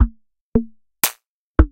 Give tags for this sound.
electro; loop; 140-bpm; drumloop

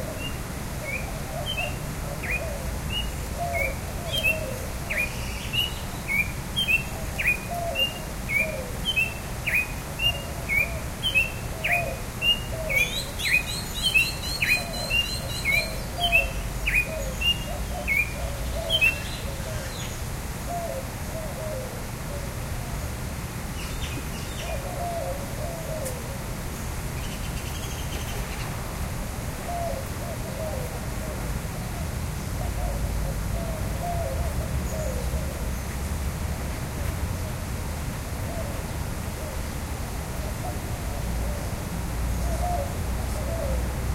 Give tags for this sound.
cardinal; zoo; aviary; doves; birds; songbird; bird

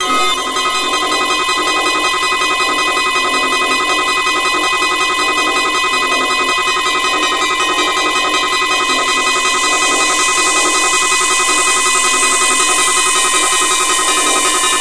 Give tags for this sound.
Darkwave; Easy; Noise

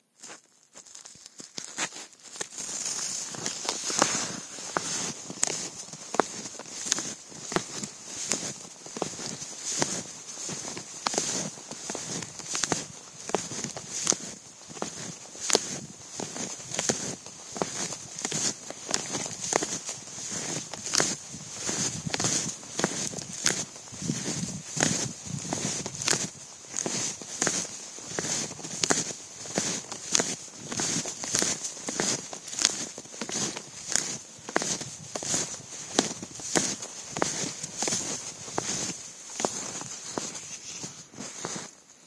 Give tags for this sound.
field-recording; footsteps; snow; snowshoes; walking

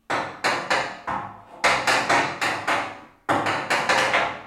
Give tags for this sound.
radiator; hammer

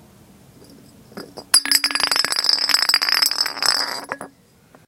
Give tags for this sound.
bottle; glass; roll

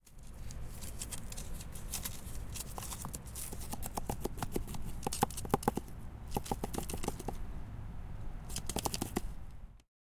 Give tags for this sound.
rustle; field-recording; design; movement; nature; microphone; Foley; sound; mic

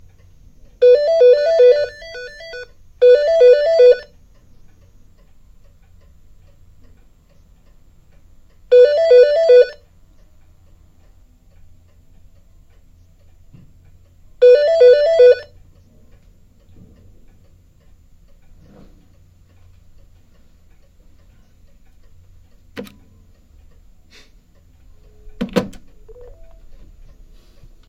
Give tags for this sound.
telephone; phone; ringing; ring

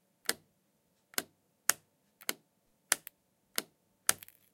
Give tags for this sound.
high; zoom-h5; foley; stereo; free; quality; denoised; h5; edited; zoom

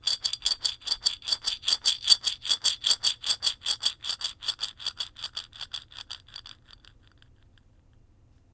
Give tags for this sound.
bottle; cooking; glass; glass-bottle; home; household; metal; metal-on-glass; percussion; pin; scrape; steel; steel-bolt; steel-pin

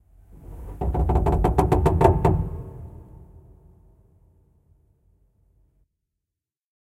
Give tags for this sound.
adjust; bass; boat; creak; creaky; creeky; door; friction; mechanism; old; organ; piano; pull; ship; slow; squeak; squeaky; tension; wood; wooden

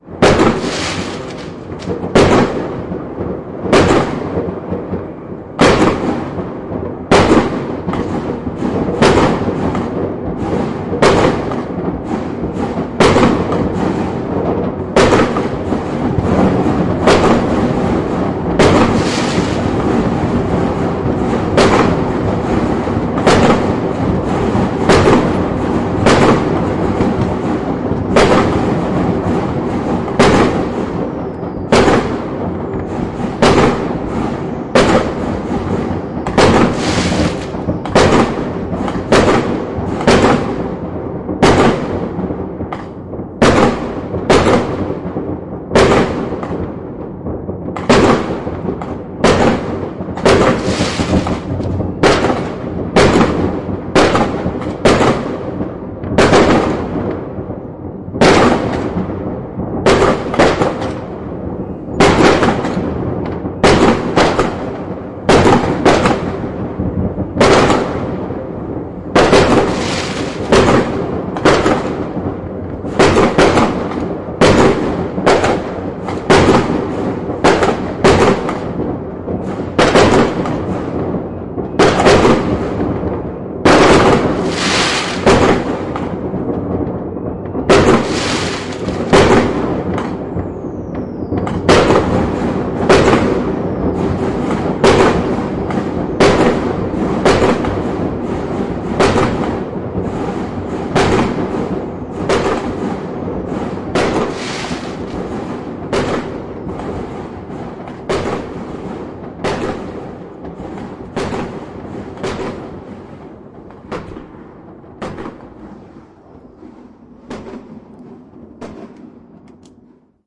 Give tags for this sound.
Festival; Gunpowder; Salutes; bombs; China; Chinese; Spring; Firecrackers; Beijing; Aerial; Explosions

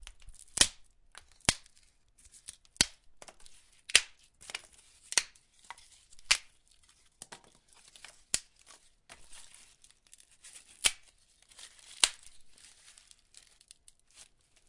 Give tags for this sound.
branches; breaking; crunching; tree; twigs

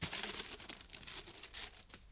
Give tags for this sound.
hits; variable

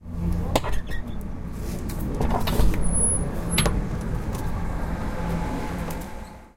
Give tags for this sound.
open; squeak; aip09; door

